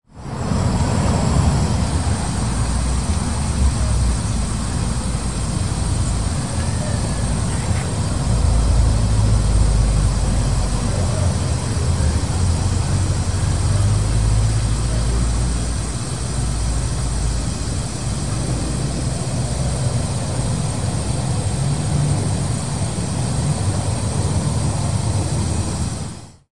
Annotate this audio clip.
Water Meter
This is a sound recorded during July, 2011 in Portland Oregon.
city, meter, oregon, pdx, portland, running, sound, sounds, soundscape, water